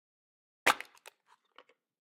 Smashing Can 05
metallic, aluminum, object, beer, drink, can, soda, beverage